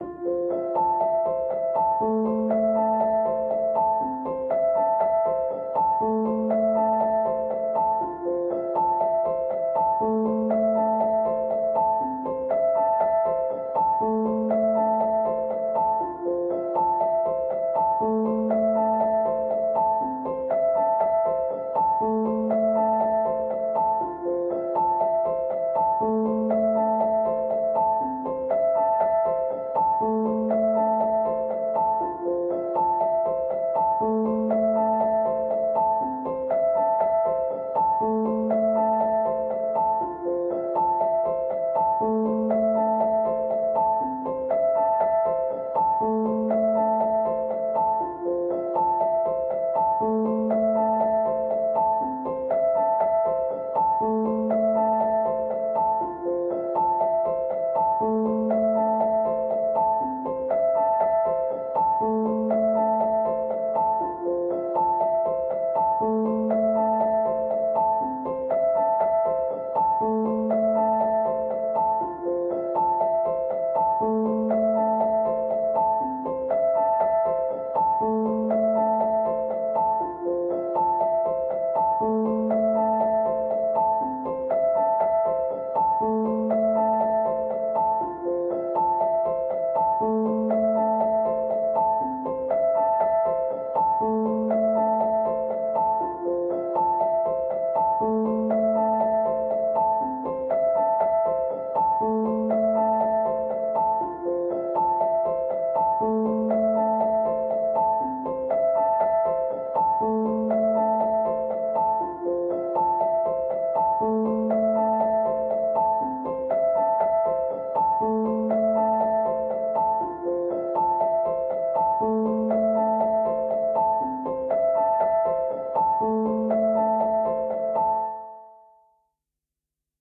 Piano loops 026 octave up long loop 120 bpm
music, samples, 120, free, simple, simplesamples, bpm, loop, reverb, 120bpm, Piano